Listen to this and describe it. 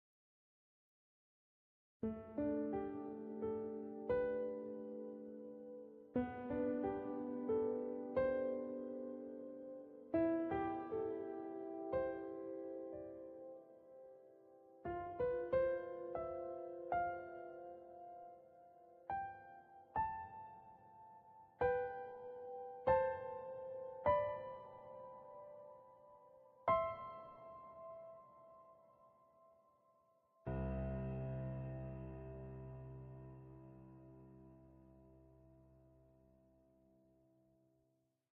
Sad ending piano #1
Sad ending piano
piano, Sad